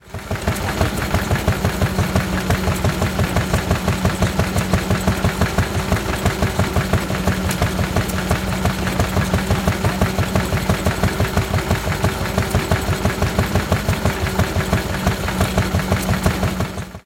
Stationary Petrol-Gas-Engine 2
Stationary engine used to drive machinery typically on a farm. Also suitable as general open-air industrial sound.
Gas, Engine, Industrial, Petrol